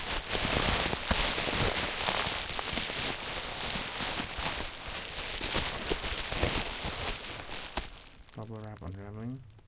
rustle.bub-Wrap 3
recordings of various rustling sounds with a stereo Audio Technica 853A
bublerap, bubble, rustle, rip, scratch